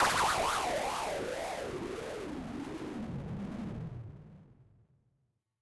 FX Laser house falling 3 128
Falling effect frequently used in electro house genre.
dance, effect, electro, falling, fx, house, laser, shots, synth